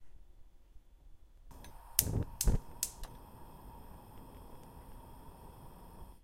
Gas stove lighting and burning. Recorded with a Zoom H1.